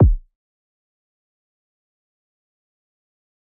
DSP ThumpKick 01
So with that being said I'm going to be periodically adding sounds to my "Dream Sample Pack" so you can all hear the sounds I've been creating under my new nickname "Dream", thank you all for the downloads, its awesome to see how terrible my sound quality was and how much I've improved from that, enjoy these awesome synth sounds I've engineered, cheers. -Dream
808, Deep, Electronic, EQ, Equalizing, FM, Frequency-Modulation, Hip-Hop, Kick, Layering, Low-Frequency, Sub, Synthesizer